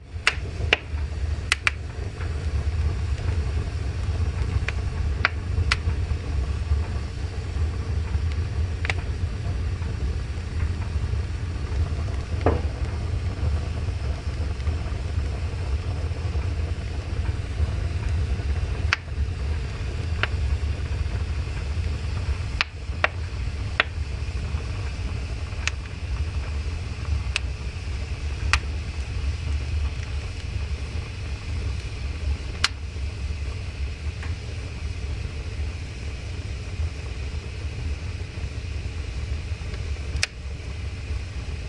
Open Fire at start 110216 03
Relaxing moments after the fiddling fire got started
fire, fire-place